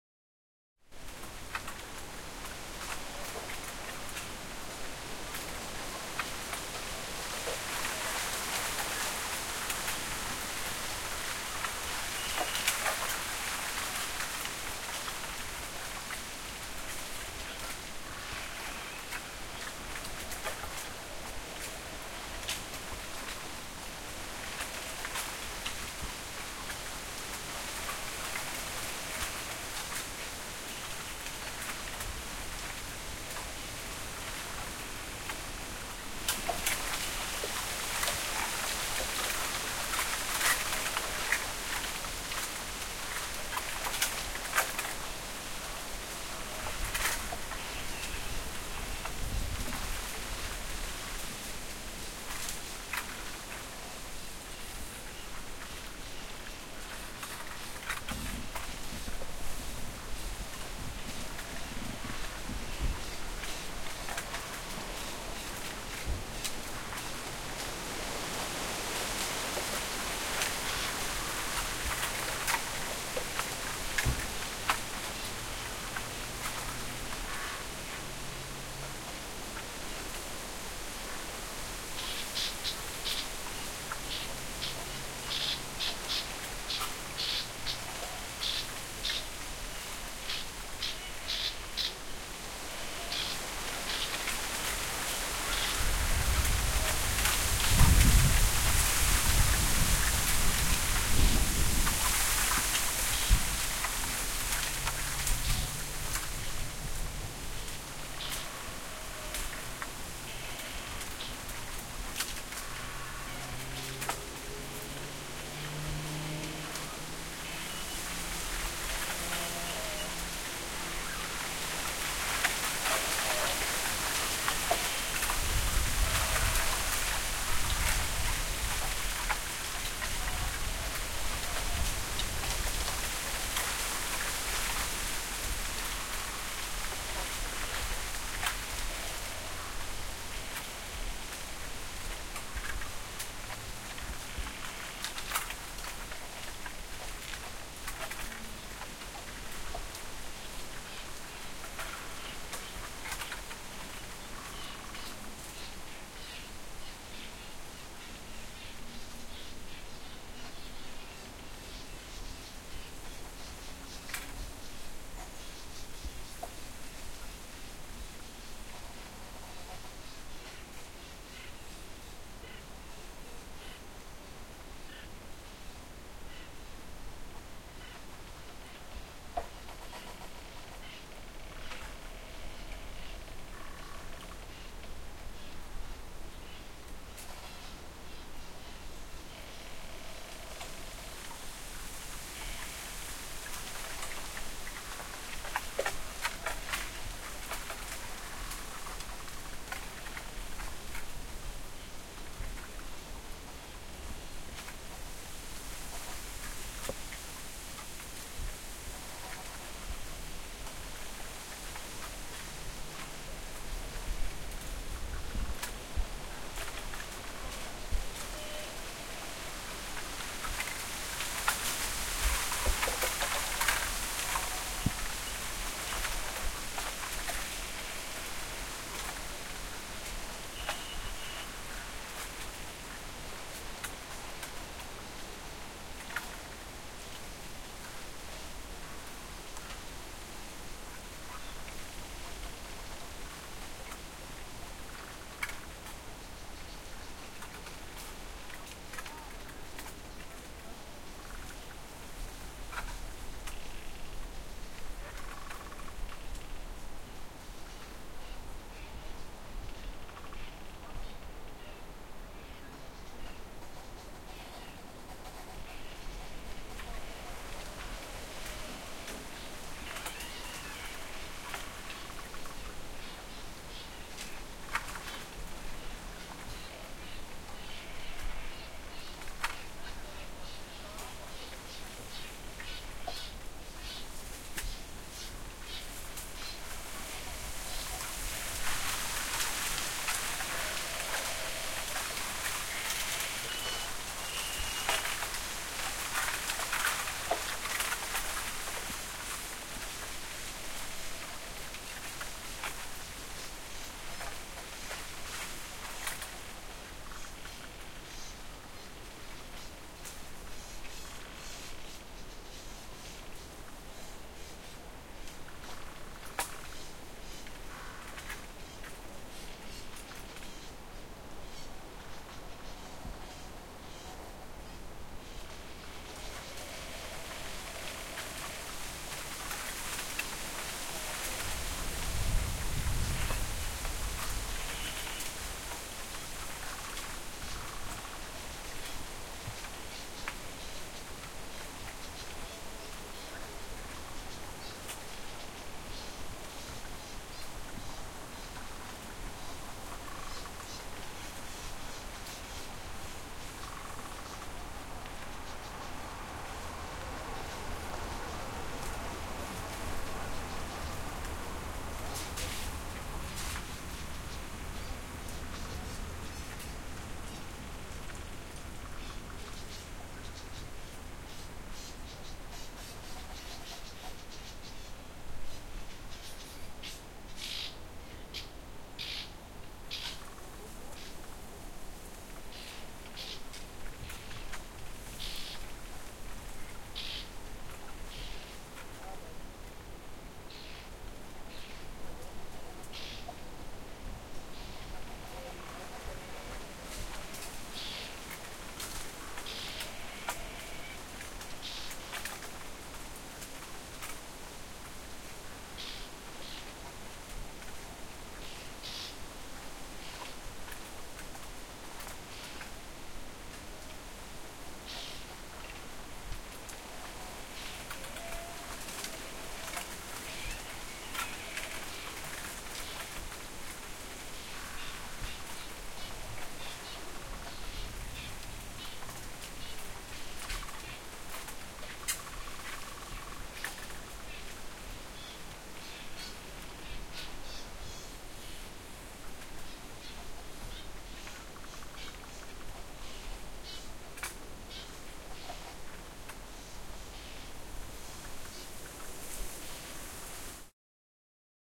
Forêt de Bambou // Bamboo forest
Small bamboo Forest, with all the naturel sounds you can expect, birds, insects wind. Recorded with a Zoom H6 late in the afternoon
Midside Mic //raw
un enregistrement effectué en fin d'après midi dans une petite forête de Bamboo an bordure d'une petite route au menu bruits de bambou balayé par le vent des oiseaux des insectes des craquement.
Enregistré avec un zoom H6 // MS raw
field-recording, forest, France, insects, nature, wind